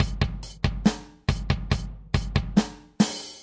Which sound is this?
fat beat 3
a simple drum loop at 140 bpm
140, beat, bpm, drum, drums, fat, old, phat, riff, school